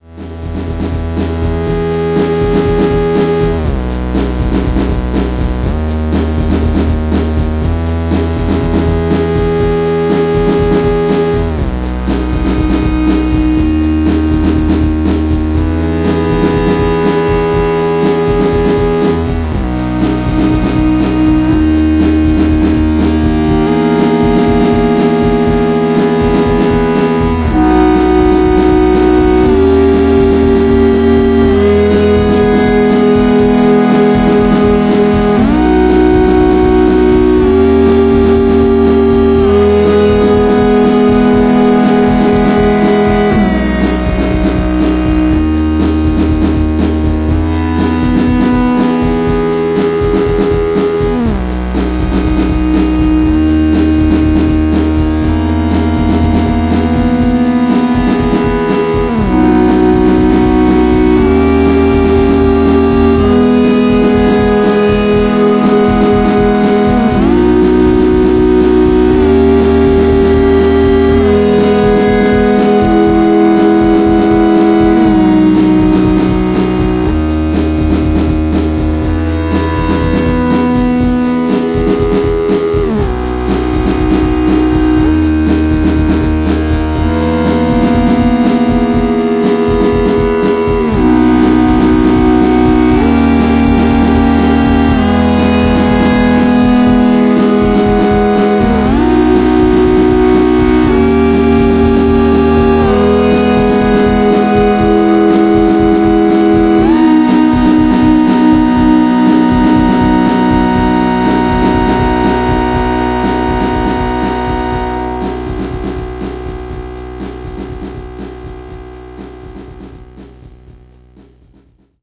This sound was recorded at some point in 2012, possibly earlier, using the iPad app AniMoog, a Roland 550W Keyboard Amp, the DM1 App for the iPad, an M-Audio PreAmp, and MultiTrack Recording Software: Sonar 6 Studio.